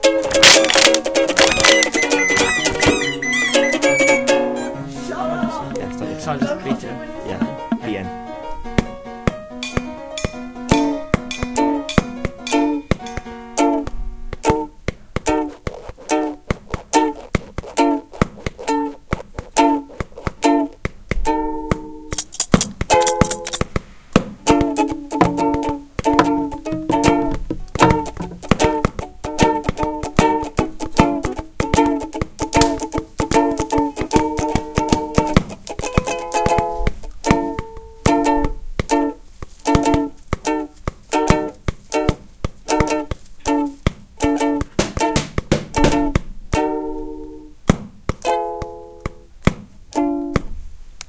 SoundScape RB KarmeleVictorKarla
Soundscape made by pupils at the Ramon Berenguer school, Santa Coloma, Catalunya, Spain; with sounds recorded by pupils at Humpry David, UK; Mobi and Wispelberg, Belgium.
karla, ramon-berenguer, victor, soundscape, karmele